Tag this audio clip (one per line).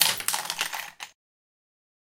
dice
game
puzzle
roll
shuffle
tile
ui